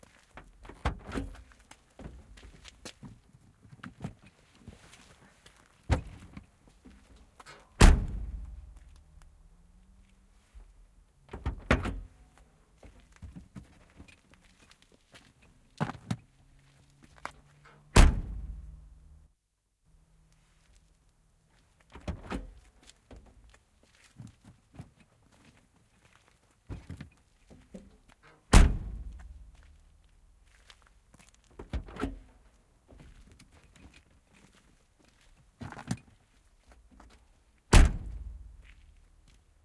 Mercedes Benz 200 D, mersu. Tavaratilan luukku auki ja kiinni muutaman kerran.
Paikka/Place: Suomi / Finland / Vihti.
Aika/Date: 1985.